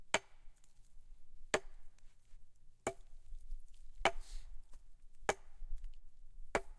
wood on wood light hit
light wooden impacts
hit, light, wooden